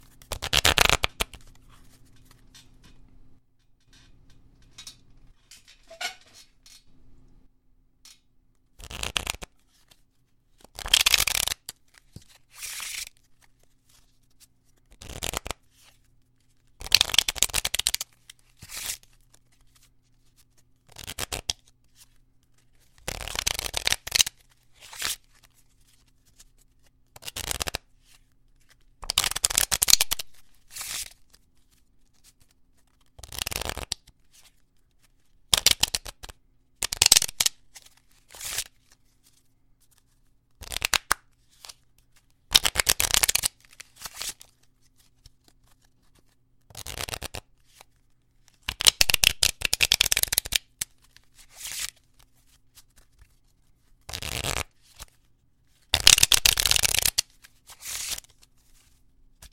Riffling a Deck of Cards, 2
riffling a deck of playing cards, plus a squeaky chair
cards, deck, playing-cards